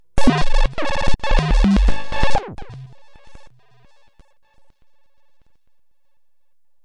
Abstract Glitch Effects 001
Abstract Glitch Effects